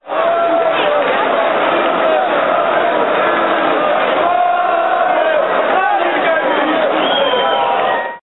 19.57 kolejorz celebration2 150510
accidental documentation of spontanious celebration of fans of the Poznan football team Lech-Kolejorz which won the Polish championship. Recordings are made by my friend from England Paul Vickers (he has used his camera) who was in the center of Poznan because of so called Annual Museums Night. It was on 15.05.2010. The celebration has placed on Old Market in Poznan.
football-team, field-recording, football, lech-kolejorz, celebraton, fans, noise, crowd, poznan, poland, championship